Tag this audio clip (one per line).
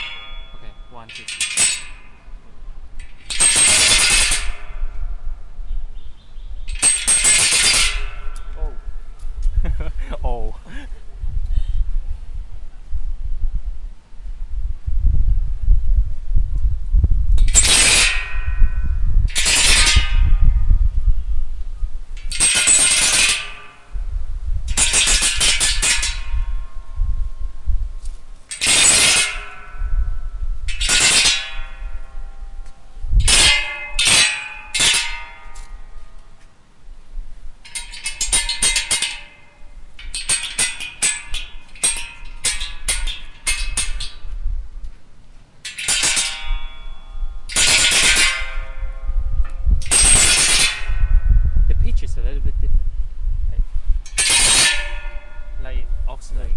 sticks
playing
metal